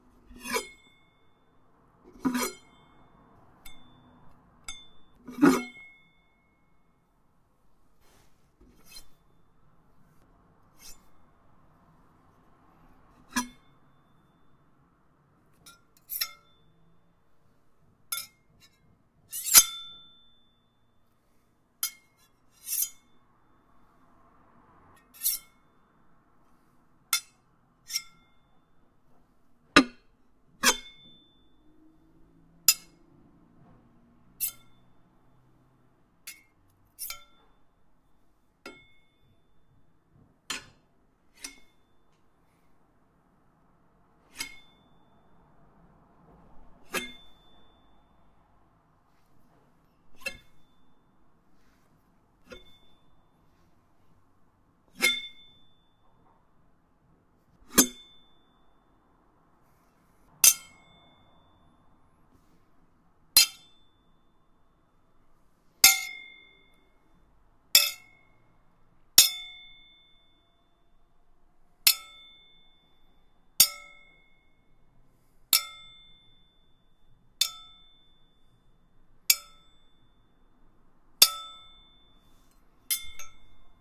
Breadknife drawing
Foley used as sound effects for my audio drama, The Saga of the European King. Enjoy and credit to Tom McNally.
This is a succession of sounds of me ringing my sharp breadknife against a chair leg or another, less noisy knife. Due to the serration it makes a beautiful, long-tailed singing noise the way swords do in movies but don't do in real life. Useful for swordfight SFX and possibly UI functions like menu selections in a game.
blade, draw, knife, knifefight, metal, ring, scrape, sheath, shing, singing-blade, sword, sword-fight, swordfight